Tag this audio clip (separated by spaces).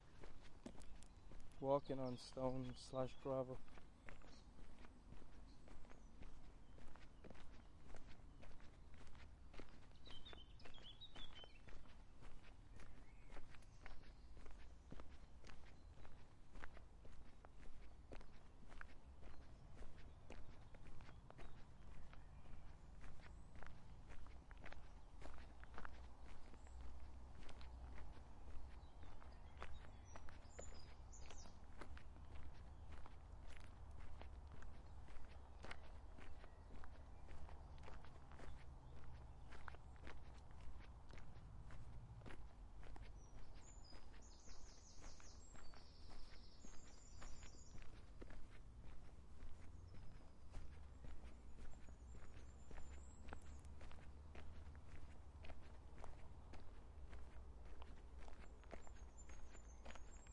birds cars driveby engine feet field-recording foley foot footstep footsteps gravel road shoes steps stone summer walk walking